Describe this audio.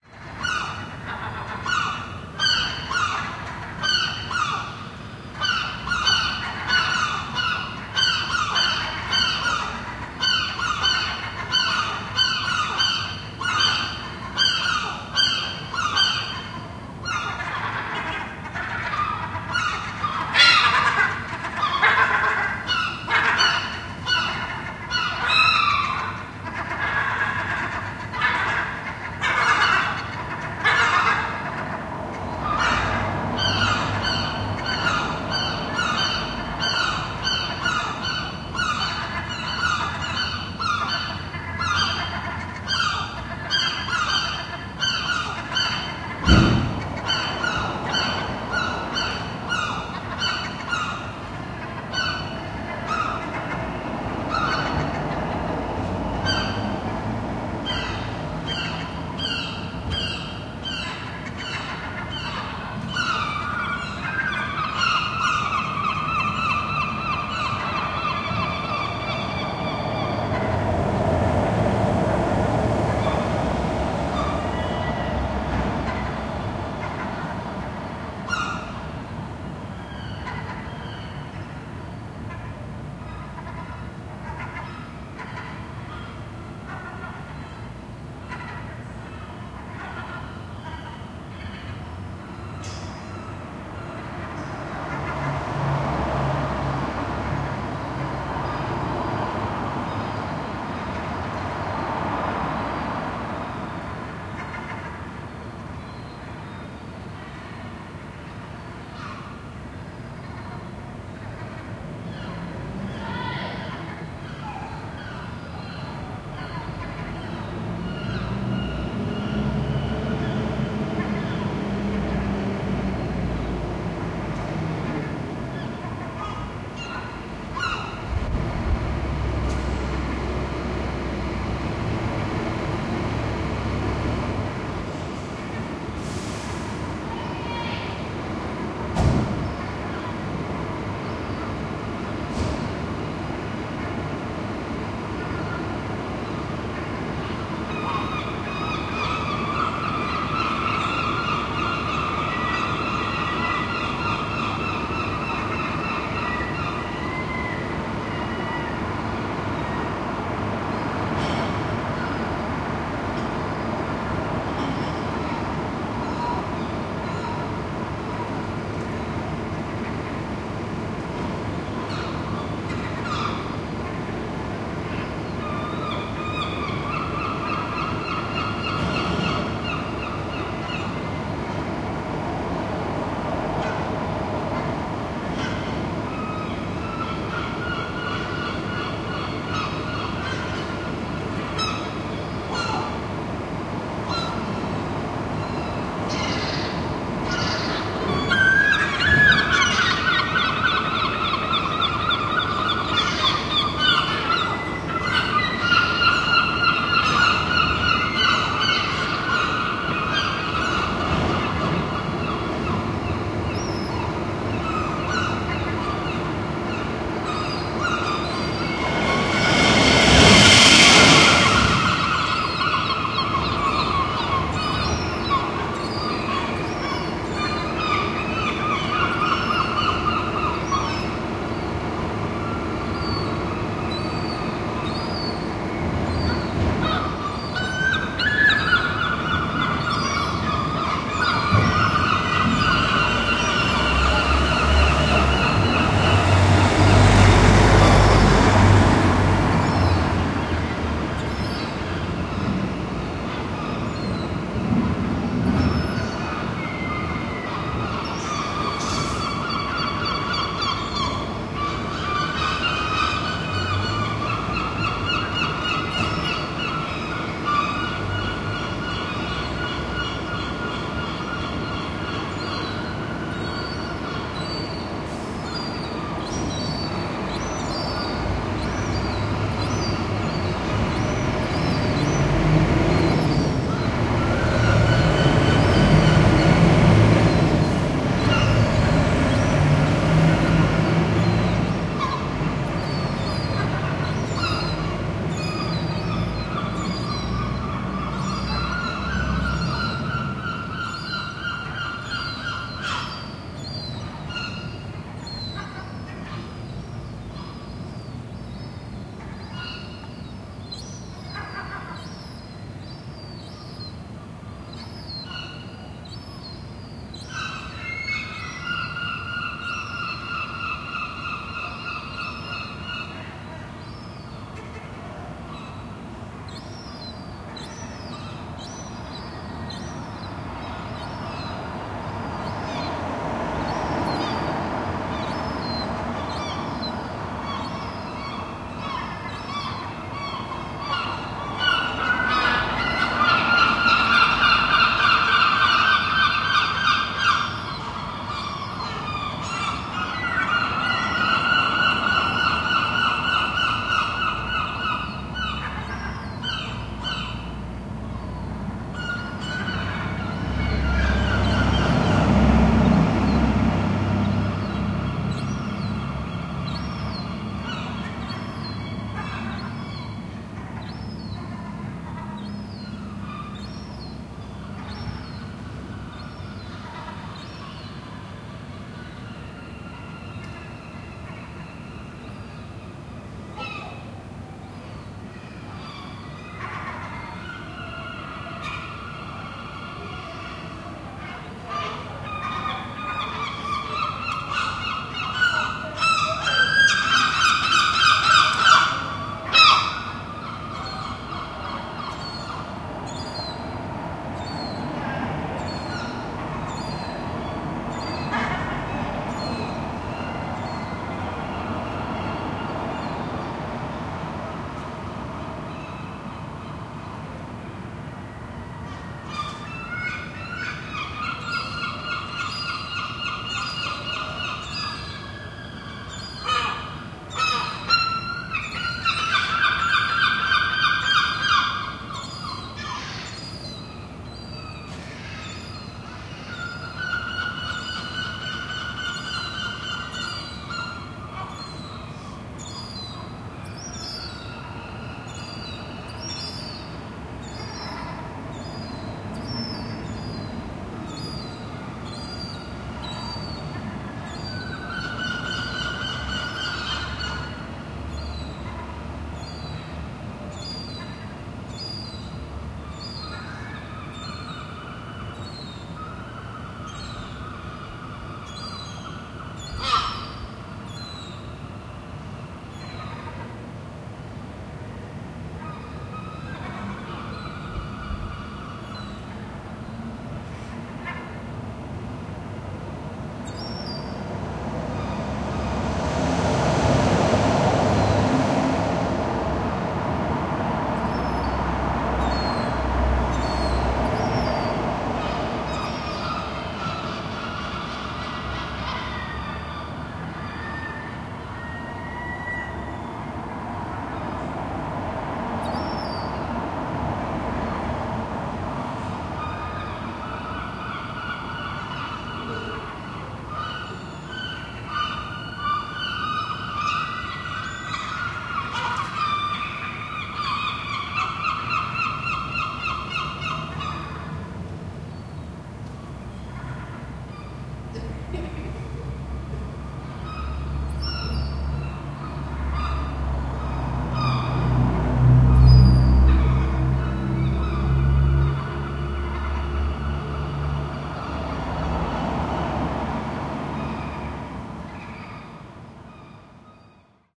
Recorded in the early morning from my guest house room window on my trusty Zoom H2. Hope it comes in useful to someone!